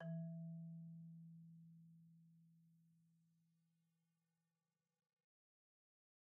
Sample Information:
Instrument: Marimba
Technique: Hit (Standard Mallets)
Dynamic: mf
Note: E3 (MIDI Note 52)
RR Nr.: 1
Mic Pos.: Main/Mids
Sampled hit of a marimba in a concert hall, using a stereo pair of Rode NT1-A's used as mid mics.
orchestra, hit, percussion, sample, wood, organic, idiophone, instrument, marimba, pitched-percussion, percs, mallet, one-shot